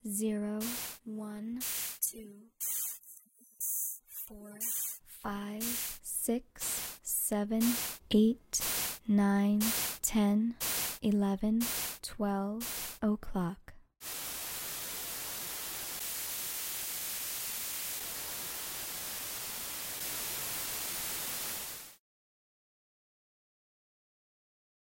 5th-order Ambisonics Clock Test (voice + white noise)
This is a synthesised 5th order Ambisonics test file. The exchange format is: SN3D normalisation with ACN channel order.
A recorded voice says each hour clockwise in the respective positions as if the listener is located in the center of a huge horizontal clock, and looking at the location of the hour number 12. A white noise follows each spoken word, and four additional noise signals are played in four positions near to the top of the sphere.
This test audio uses sounds from the pack "Numbers 0-20" by tim.kahn
This test audio was generated using Ambiscaper by andresperezlopez
Voice, Spatial, 3D-sound, Ambisonic, Noise, Testing, HOA, 3D, Clock, Check, Processed, Test, Ambisonics